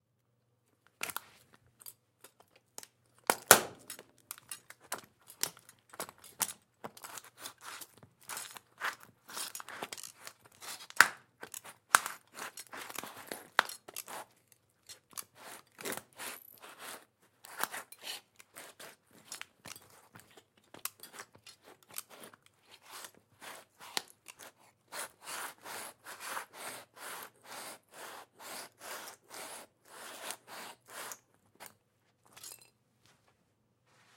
Stepping on/crushing small plastic particles
Crushing small plastic items with a shoe on concrete floor. Only effect applied is a 100Hz highpass.